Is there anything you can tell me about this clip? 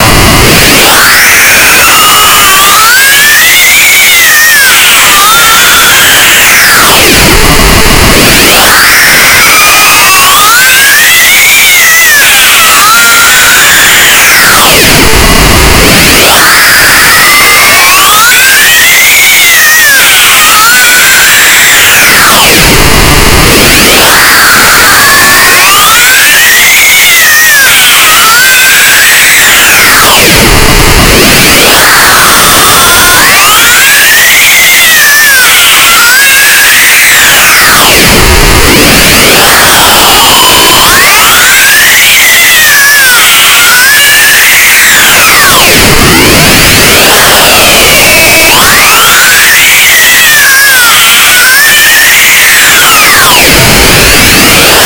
Deeply disturbed scream
This sound is bound to give you an aching head if you expose yourself to listening to this sound for several minutes. What this is? This is a remix of the sample Psycho scream 1 with added extra crank, grit and hostility.
hardcore, degraded, hell, scream, distortion, headache, painful, lofi